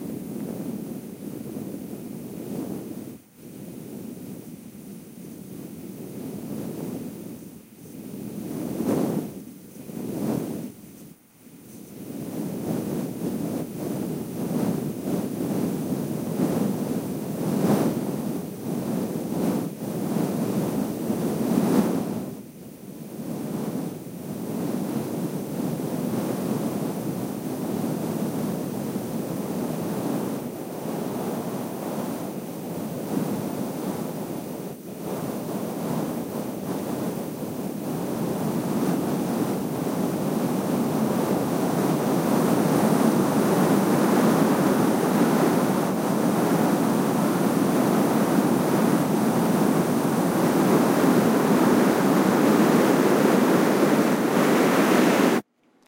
high wind 2
This is an imitation of hurricane force winds i made up by squirting compressed air across the mess of my mic. unprocessed raw sound.
hurricane, wind